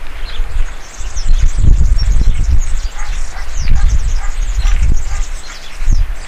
This sound was recorded with an Olympus WS-550M. It's the sound of a common bird called "Gafarró" in the countryside. You can also hear a dog in this sound.
dog, bird, nature, countryside